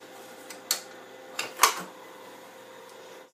Inserting 8-inch floppy-disk and closing drive-latch
Inserting a large 8-inch floppy-disk into a Shugart floppy disk drive and closing the plastic front bezel drive latch. Noisy recording with the fan of the enclosure and neighboring system humming along.
1970, 1980, Computer, Computers, Disk, Fan, Floppy-Disk, Noise, Office, PC, Retrocomputing, Shugart